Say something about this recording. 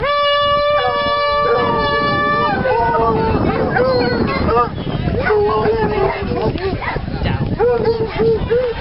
Hunt Horn
I happened to record this at a meet of a local fox hunting pack, on a digital video recorder and extracted this portion of the soundtrack - it makes a vary good IM message on a mobile phone.